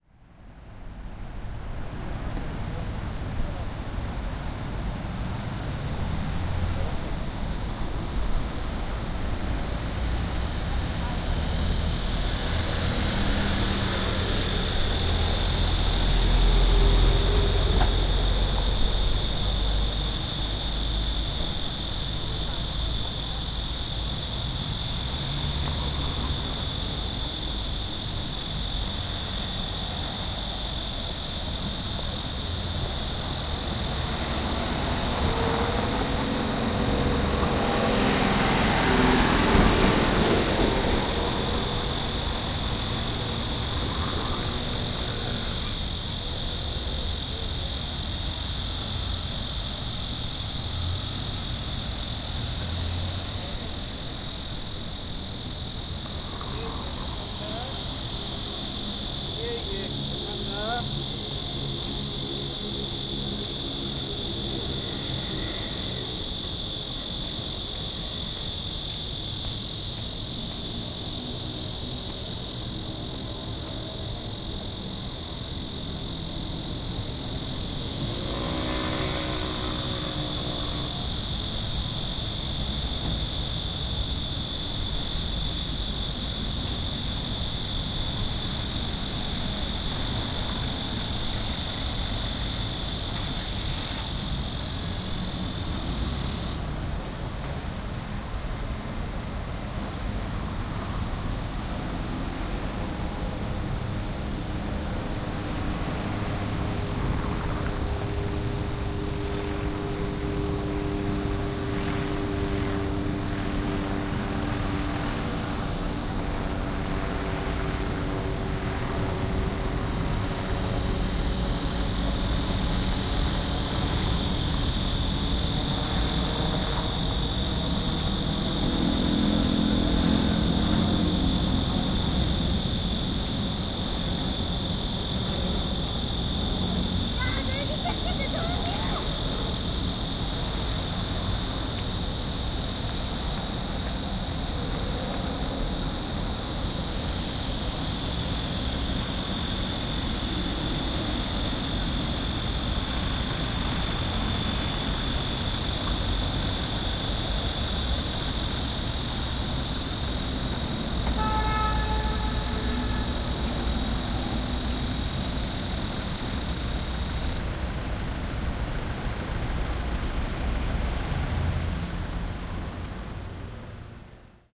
0370 Cicada helicopter
Cicada, helicopter, traffic and some people walking around a talking in Korean.
20120716